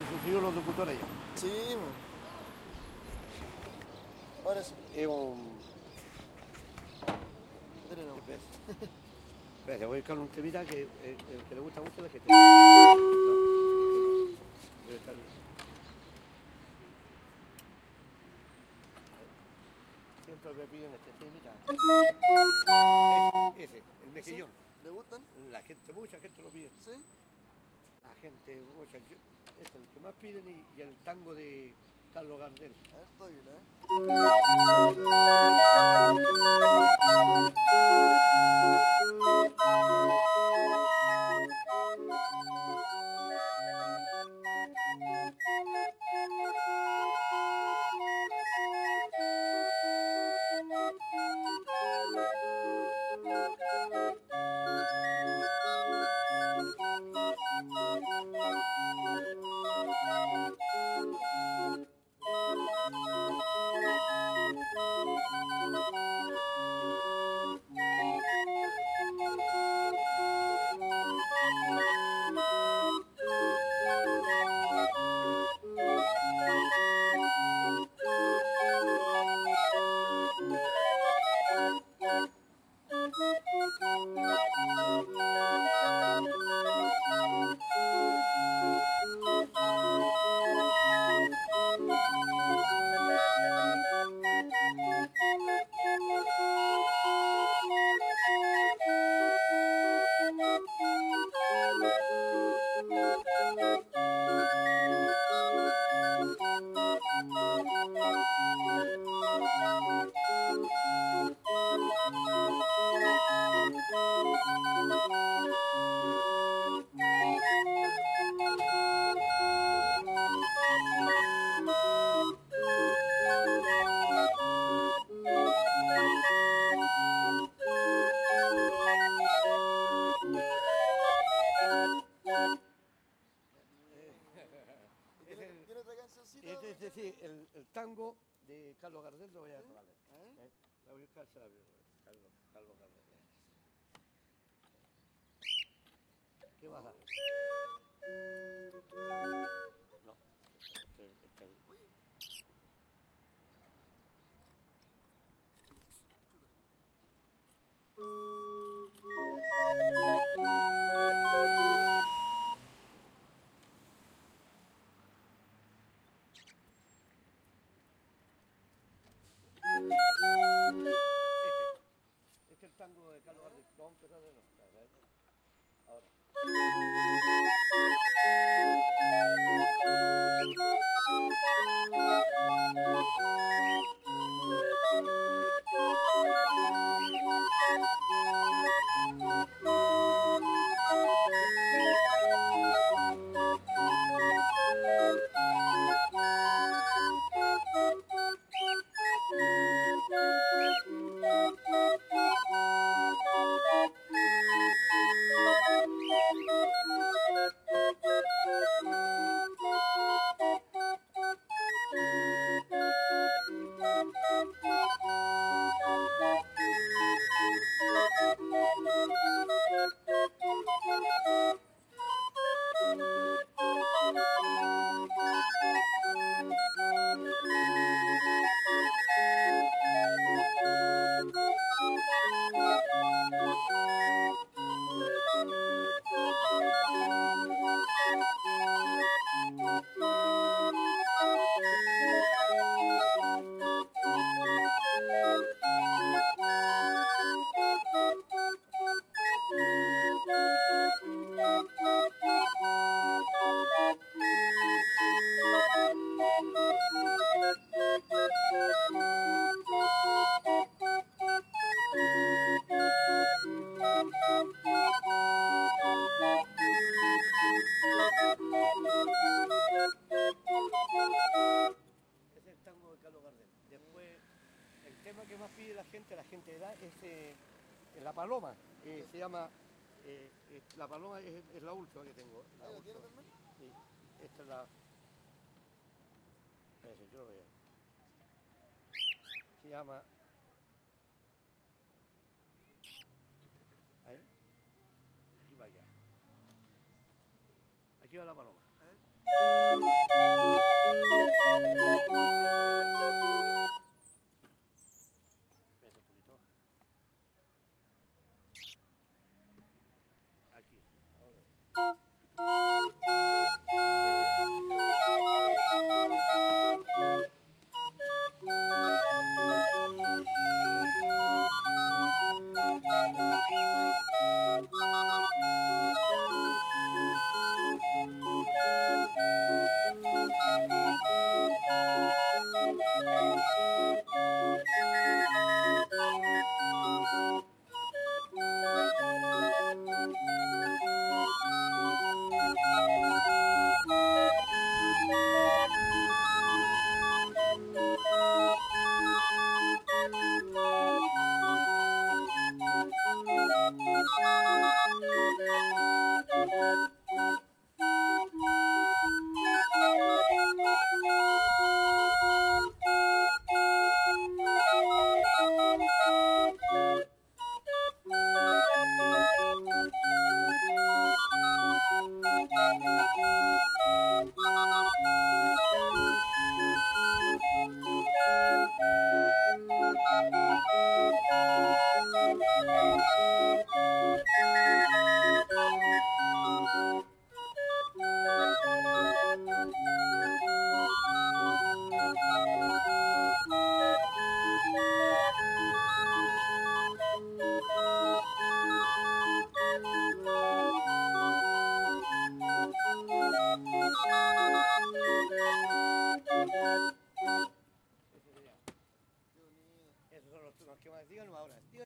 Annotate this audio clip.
Organillo played in the streets of Santiago de Chile. In Chile, whoever plays the organillo is commonly called "organillero".
chile, organillero, santiago